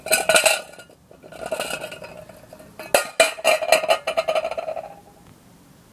Rolling Can 01
Sounds made by rolling cans of various sizes and types along a concrete surface.